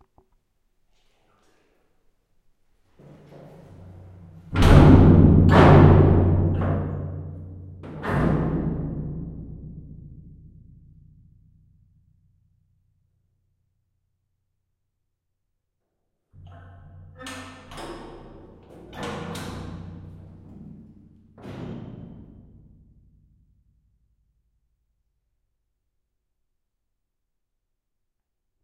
Heavy Gate Metal 03 (underground bunker of civil defense, Vsetin City, Club Vesmir)
Recorded on SONY PCM-D50 in underground bunker of civil defense. Vsetin City, Club Vesmir. Czech Republic
Aleff
basement, bunker, close, closing, door, doors, gate, heavy, metal, open, opening, squeaky